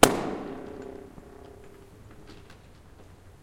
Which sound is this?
Balloon Tunnel
Popping a ballon inside a tunnel